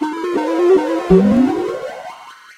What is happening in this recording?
Computer Bubble A2
computer, bubble, 8-bit